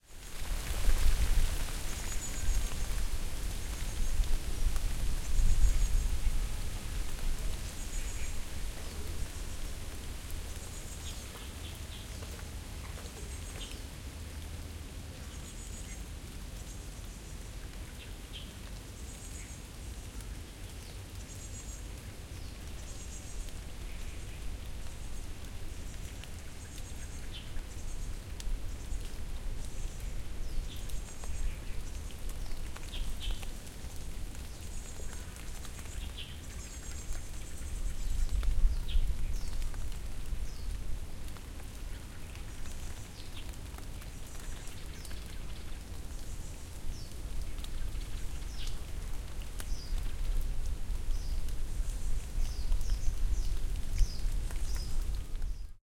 gu zhong gong yuan rain
This is another recording from "Old Bell Park" in suburban Shanghai. On this weekend around noon, it was raining and there were no visitors. One can hear birds, rain and wind rustling in the trees on this shorter recording.
park, rain, ambience, Asian, wind, Chinese, field-recording, China, Nanhui, Shanghai, suburb, birds